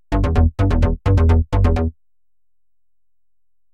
Techno Basslines 002
Made using audacity and Fl Studio 11 / Bassline 128BPM
bass, bassline, samples, techno